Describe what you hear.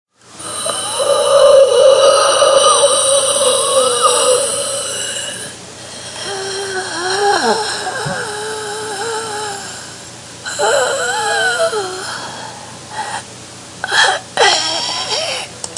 Zombie Breathing Groan
I recorded myself right before my transformation in to a zombie. Thankfully I uploaded this in time. I feel it taking over me now.
breathe, gasp, growl, hiss, horror, monster, snarl, undead, zombie